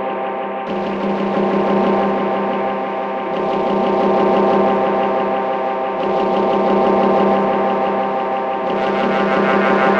Post apocalyptic spice for your sampler made by synthesis and vivid imagination.
texture, dark, glitch, electronica, deep-pinto-persppectives, pad, noise, drone